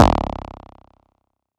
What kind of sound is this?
Bassdrum w/ knorr
abl, realism